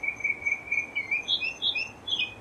A bird singing
bird, birds, birdsong, field-recording, forest, nature, singing, spring